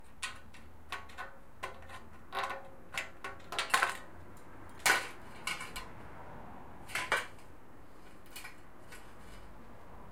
metalworking.scissors
Construction worker cuts metall using scissors.
Recorded 2012-09-30.
builder, clang, clash, construction, cut, elector, metal, metalworking, noise, repair, rumble, scissors